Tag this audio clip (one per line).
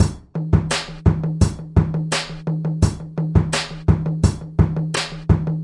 downtempo
lounge